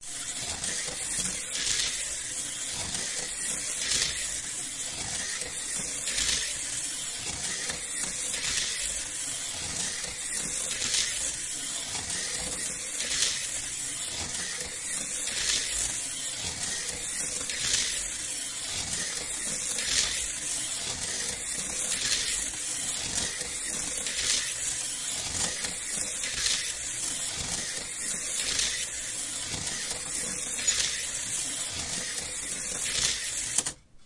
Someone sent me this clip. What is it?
slot cars01
The sound of a small electric toy car being "driven" around a small oval of plastic track. There are two places where the two parallel slots cross each other, accounting for some of the regular "click-clack" noise.
This particular car completes 15 laps before jumping out of the slot and "crashing". Each car in this sample pack is from the same manufacturer, and from around the same time, but because most of the fun was in customizing the cars with different wheels and bodies and other components, each car sounds different.
Recorded using an M-Audio Micro-Track with the stock "T" stereo mic held about 6" above the center of the oval.
scale, 1960s, electric, toy, slot-car, ho